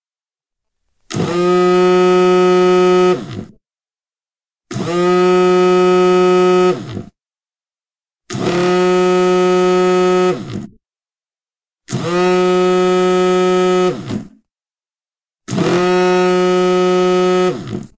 Mobile Vibrate
A mono loop of a mobile phone (cellphone) set to vibrate and resting on a wooden table. Rode NT4 > FEL battery pre-amp > Zoom H2 line in
vibrate, mobile-phone, loop, mono, telephone, cellphone, mobile, samsung